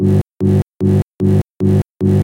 G1 Fuzz bass Rhodes
The lowest G on my 1972 Fender Rhodes jazz organ rattles the lid covering the hammers and pickups.
I isolated it to make a "buzzing trunk lid" bass noise and repeated it 6 times.
bass, Buzz, wobble, dubstep